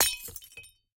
Common tumbler-style drinking glass being broken with a ball peen hammer. Close miked with Rode NT-5s in X-Y configuration.
hammer, tumbler, break